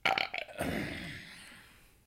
Burp, exhale into microphone.
human, exhale, sound, burp, body